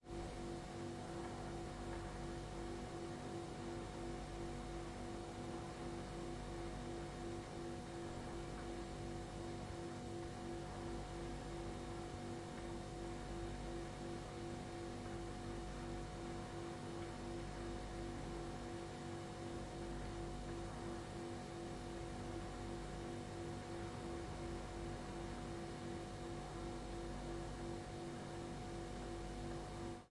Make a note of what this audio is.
Recording of a Bosch refrigerator.
Processing: Gain-staging and soft high and low frequency filtering. No EQ boost or cuts anywhere else.